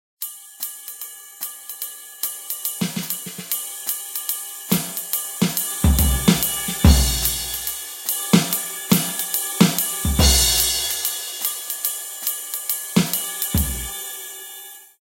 KC MUS152 jazz beat
swing jazz beat
drums, MUS152, swing